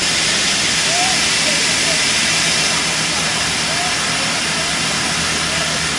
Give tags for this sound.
911; fire; fire-fighting; fireman; firemen; hero; heroes; liquid; put-out; put-out-fire; safe; savior; water; wipe

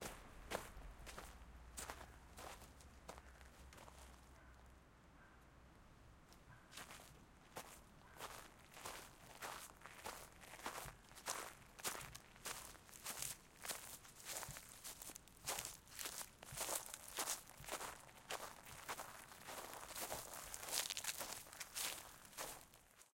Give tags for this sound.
step; foot; footstep; walk; walking; footsteps; gravel; steps; leaflitter

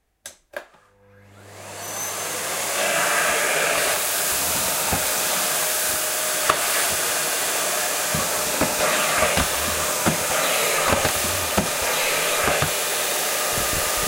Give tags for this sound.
cleaner; vacuum; cleaning; floor; starting; dust-cleaner; dust

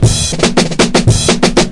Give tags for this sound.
break; breakbeat; dnb; drum; drum-and-bass; drum-loop; drums; jungle; loop

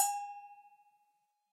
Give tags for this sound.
samba; bells; hit; cha-cha; latin; percussion

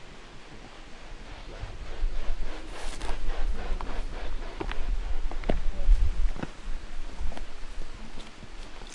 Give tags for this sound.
Jungle; Asia; Nature; East; South; Birds; Cambodia; Hornbill

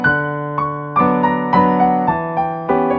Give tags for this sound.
sample; waltz; chopin; classical; music; piano; yamaha; digital; frederic

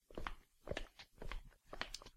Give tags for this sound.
footsteps; shoes; tile; tiles; floor; foley; steps; walking; walk

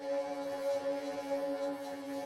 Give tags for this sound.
pitch-shifter; delay; SFX; processed-voice

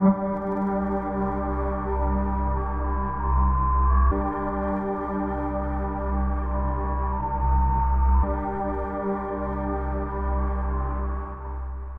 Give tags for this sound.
ambience; atmos; atmosphere; atmospheric; background-sound; horror; intro; music; score; soundscape; suspense; white-noise

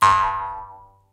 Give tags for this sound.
bo-woah-oh; boing; sfx; jews-harp; cartoony; funny; loony; comic; jaw-harp

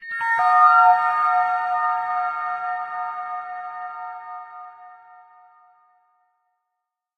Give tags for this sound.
games; audacity; indiedb; indiedev; indiesfx; android; gameaudio; gamesound

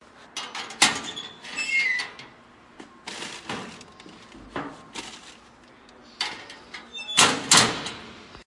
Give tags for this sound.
locker; metal; opening-closing

